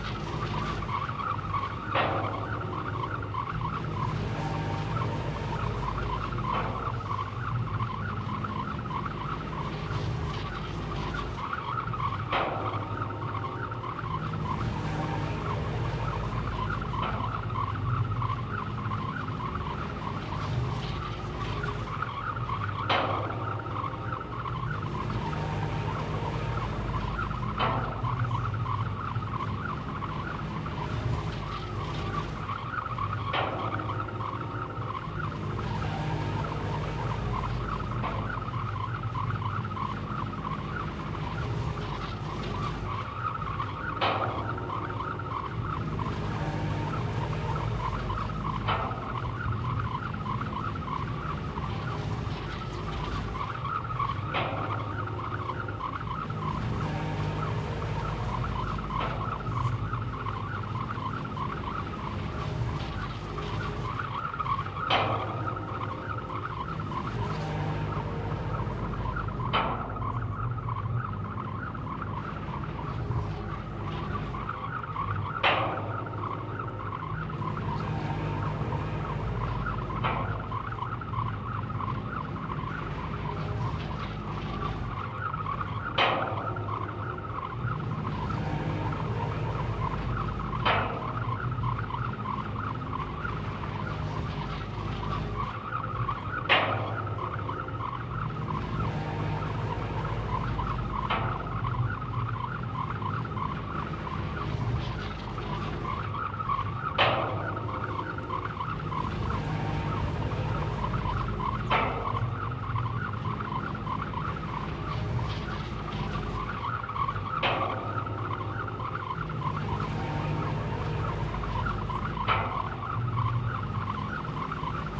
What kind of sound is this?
Petroleum extraction mechanical pump
petroleum, pump, mechanical